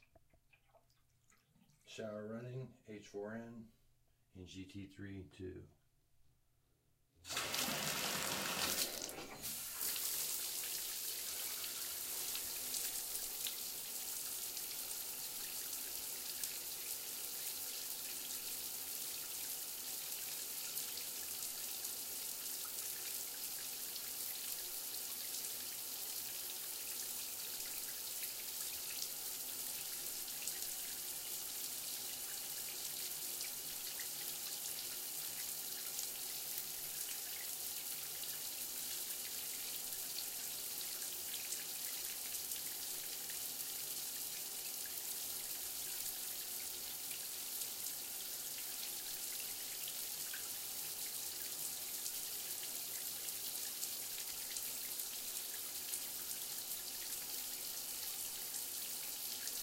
shower running 1
bathroom sounds h4n and rode mic
tub, running, water, bathroom, faucet, shower, bath